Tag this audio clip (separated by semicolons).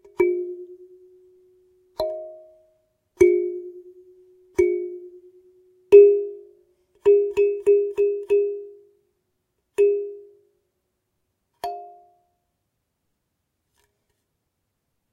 musical-instrument; instrument; african; toy; tone; thumb-piano; plucked